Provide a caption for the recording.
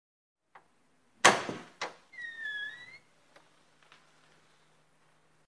door unlocking
close, squeak, key, unlock, door, open, lock